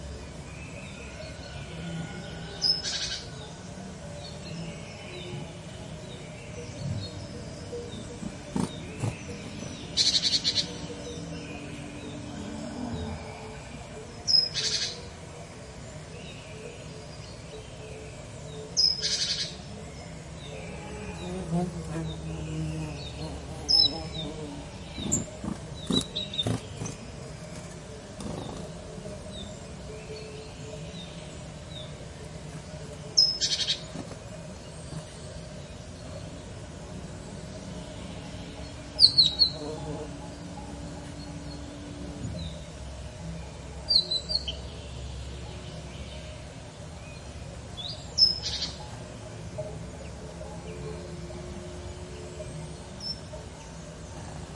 A warbler singing near human habitation (i.e.with some background noise). EM172 Matched Stereo Pair (Clippy XLR, by FEL Communications Ltd) into Sound Devices Mixpre-3 with autolimiters off. Recorded near Aceña de la Borrega, Extremadura (Spain)
bird, birdsong, field-recording, tweet, warbler